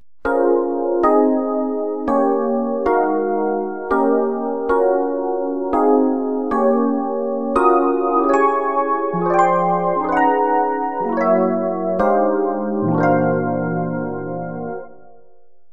Rhodes played in 7/8